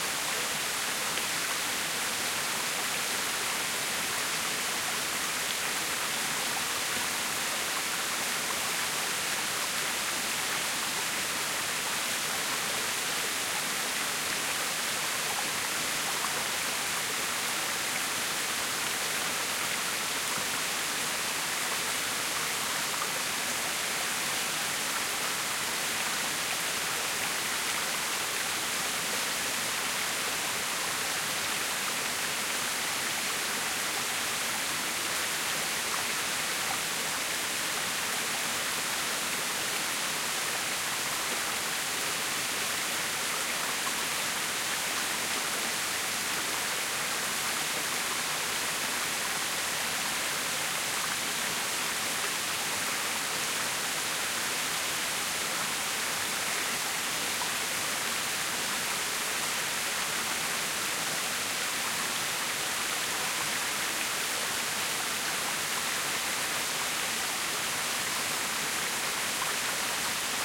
River-Creek FurtherAway Forest
Normally this is a small creek in the forest - but this time after 4 Days of constant rain, the creek became a river - and ran wildly through the forest. This pack contains different recordings from further away and close up of the flowing creek. So could be useful for a nice soundmontage of getting closer to a waterstream or hearing iht from a distance.....